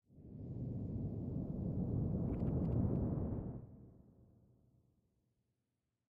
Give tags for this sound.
swoosh; sfx; slow; transition

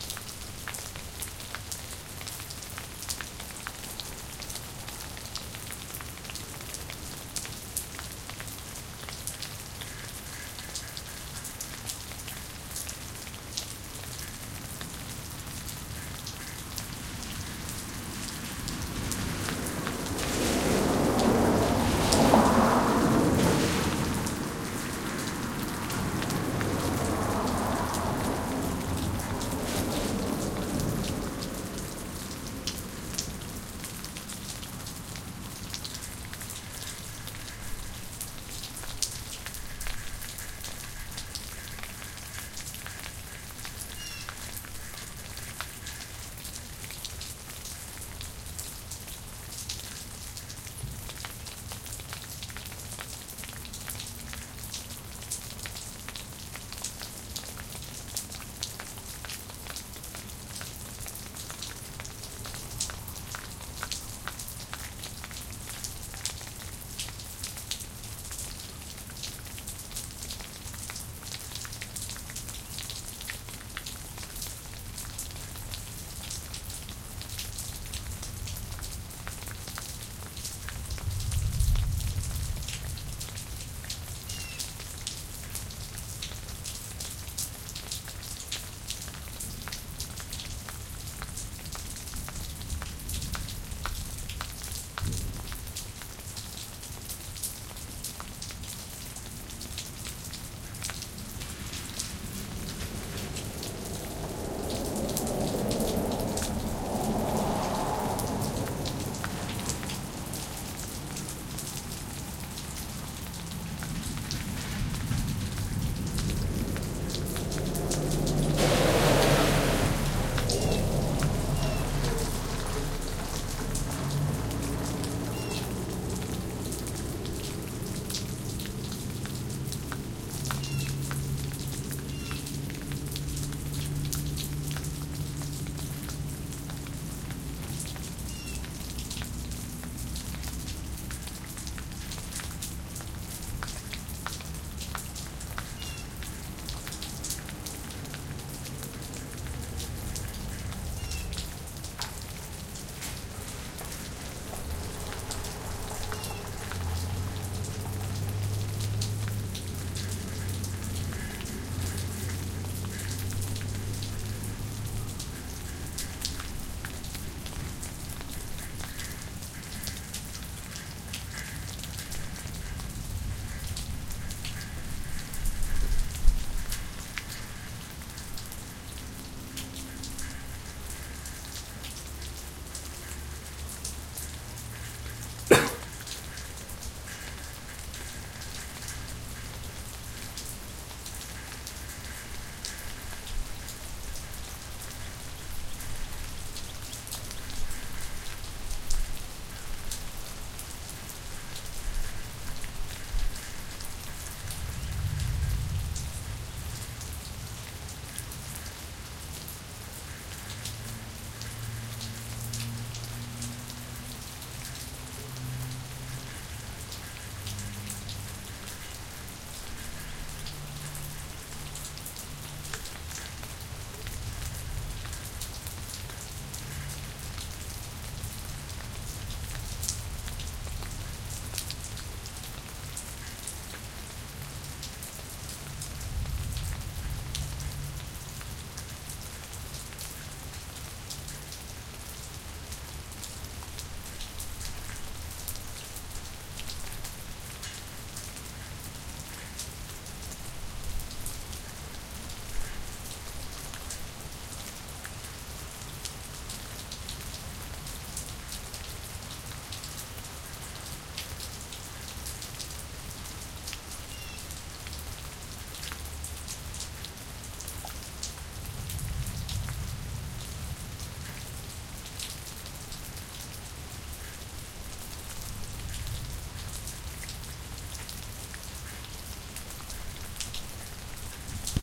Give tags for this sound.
car; field-recording; nature; passing; rain; storm; street; thunder; weather

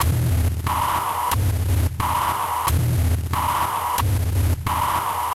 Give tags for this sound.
spooky; ghostly; death; grips; noise; overdrive; overload; deathgrips; hell; sinister; black; doom; crunch; feedback; distorted; scary; garage; horror; evil; bass; distortion; metal; creepy; harsh

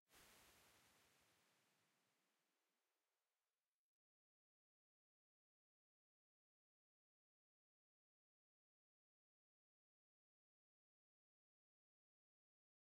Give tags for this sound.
convolution; IR; impulse-response

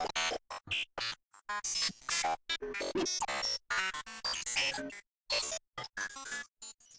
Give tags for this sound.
bed; bumper; imaging; radio; splitter; sting; wipe

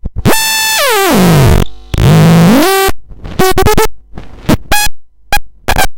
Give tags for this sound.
Electronic; glitch; lab

